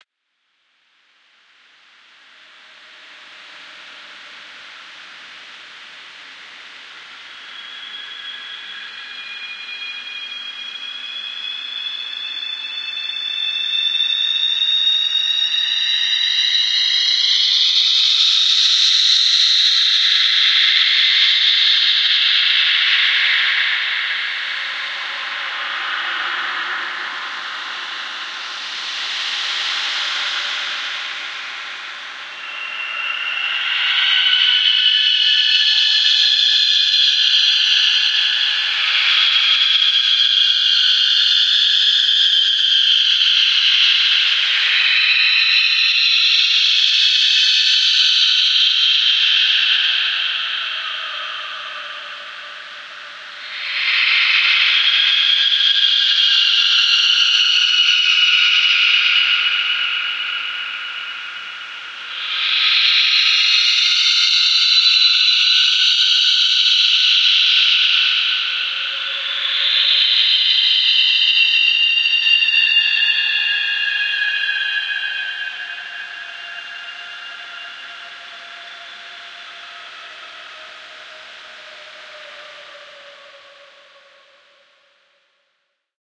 Here's something different. With my InterSound Dimensional Recorder, I caught the sound of some poor tortured soul in Purgatory. This thing is way cool, as all it needs are coordinates to the exact spot in the hereafter you want to pick up sound in. I feel for this guy, I really do...
(Actually mastered in FL Studio via Pauls Sound Stretch - I took the actual fighting part. I wish you didn't have to write this, as it ruins the mystique I was going for...)